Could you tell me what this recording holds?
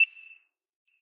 Short beep sound.
Nice for countdowns or clocks.
But it can be used in lots of cases.